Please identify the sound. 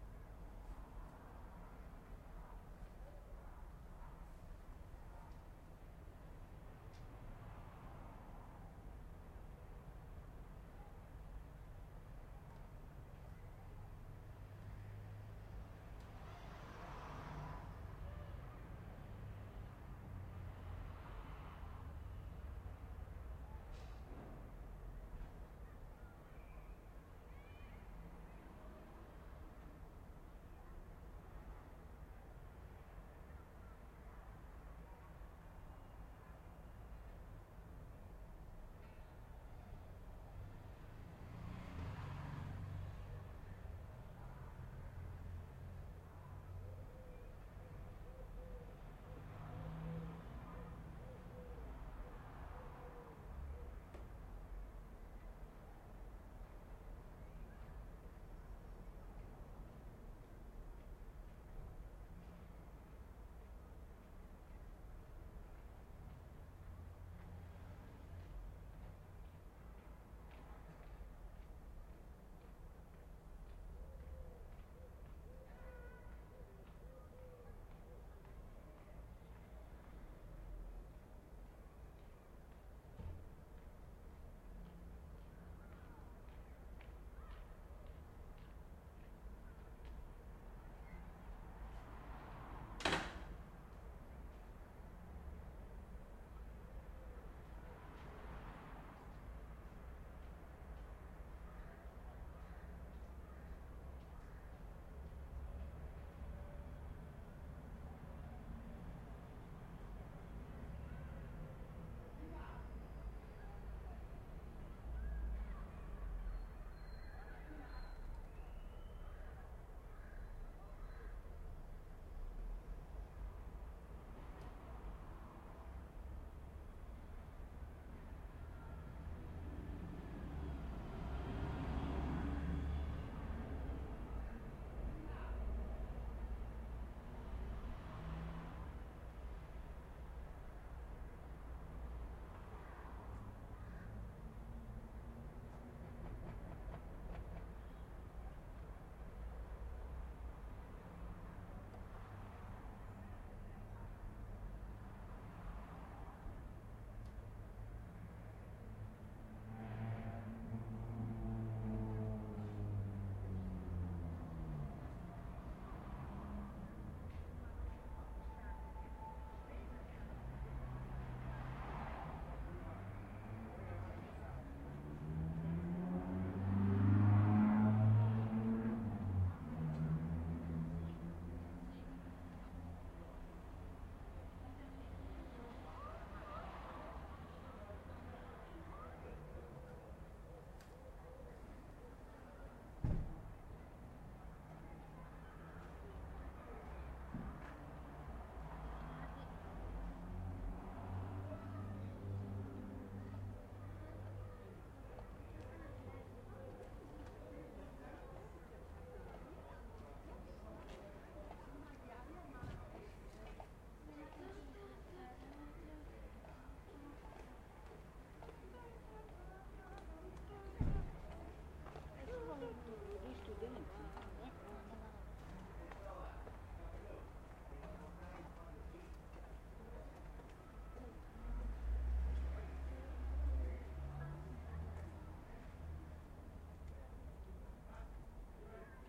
ambience urban udine 2013
urban ambience, a street in Udine, Italy, september 2013, recorded with Zoom H4n, Sennheiser shotgun mic and Rode blimp
field-recording, ambience, street, urban, city, cars